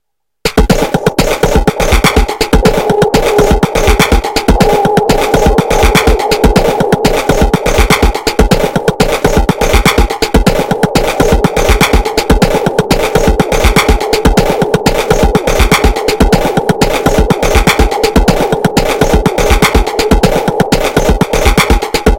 Digital Melt
ambient, bent, circuit, drums, roland